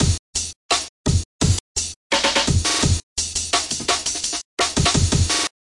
break beats loops 170
170, break, loops